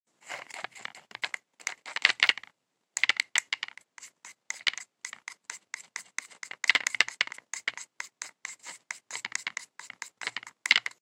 Palette Knife scraping paint from a Palette